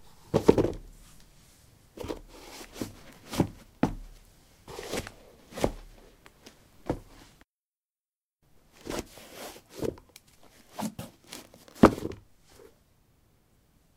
paving 15d darkshoes onoff
Putting dark shoes on/off on pavement. Recorded with a ZOOM H2 in a basement of a house: a wooden container filled with earth onto which three larger paving slabs were placed. Normalized with Audacity.
footsteps, step, footstep, steps